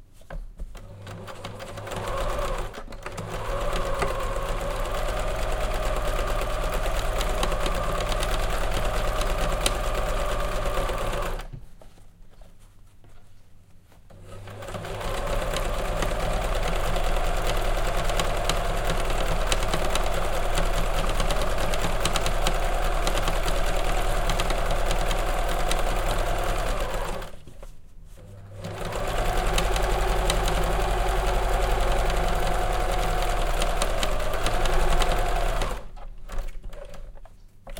sewing-3runs-2
Recording of a modern sewing machine (brand unknown) sewing three basic zigzag seams. Recorded for Hermann Hiller's performance at MOPE08 performance art festival in Vaasa,Finland.
field-recording, zigzag, sewing, sewing-machine, three-seams